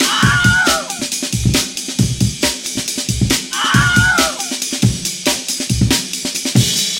Wilhelm vs. Amen Break
amen-break, break, breakbeat, loop, scream, wilhelm-scream